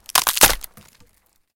Crunching, Wooden Fence, B
Raw audio of crunching wooden fence panels. Sounds remarkably like cracking bones.
An example of how you might credit is by putting this in the description/credits:
And for similar sounds, do please check out the full library I created or my SFX store.
The sound was recorded using a "H1 Zoom V2 recorder" on 21st July 2016.
crunch, crunching, fence, fences, panel, wood, wooden